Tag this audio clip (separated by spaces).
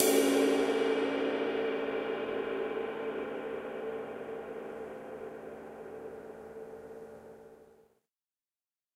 beat drums drum click wood sticks